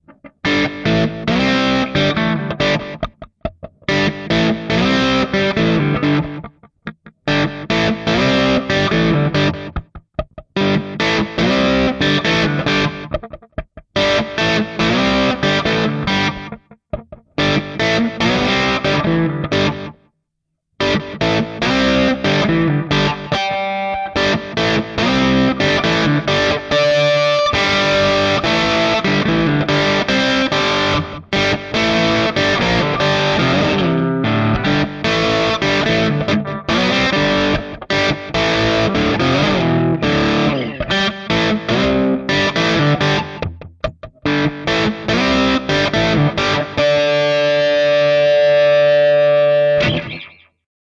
sorta open g blue
blues style riff, plenty of delay and reverb. Slight distortion, sorta choppy with some snap like breaks in the riff. Bit messy at times.